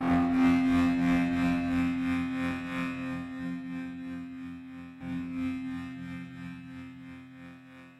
C3 Laughing Texture Bass
Recorded with Volca FM and Microbrute, processed with DOD G10 rackmount, Digitech RP80 and Ableton
ambient, bass, pad, sample, soundscape, space